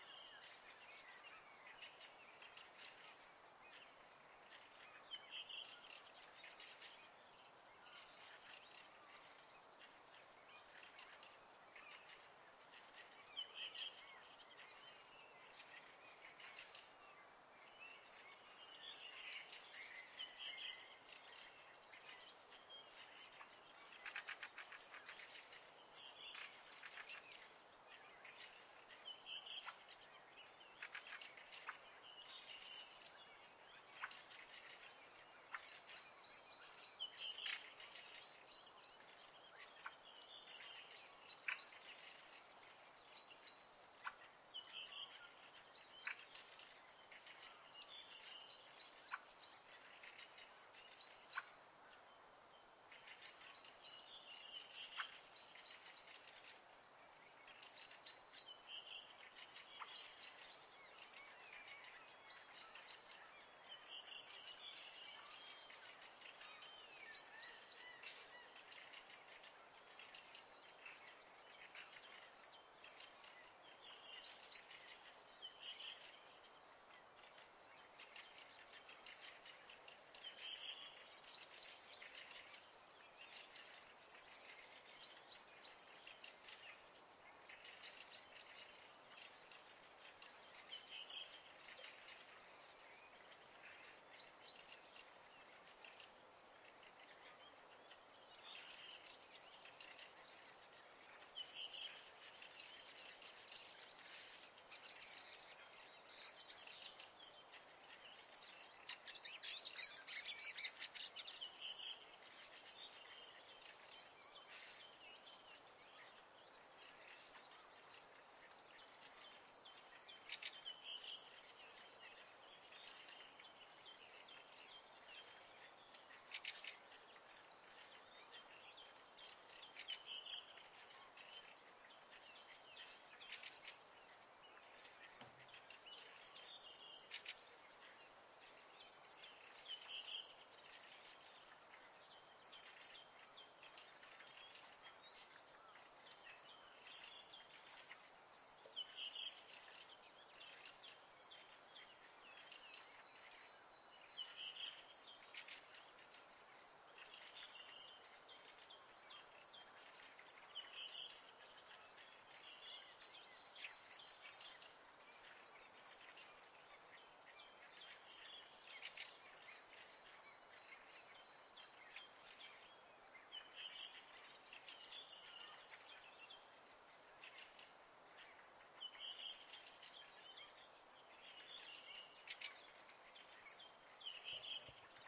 fugler natt vaar
Birds going crazy at 2 in the night during spring in Norway.
Recorded in Helgeland, Norway.
ambiance, ambience, atmosphere, bird, field-recording, nature, norway, soundscape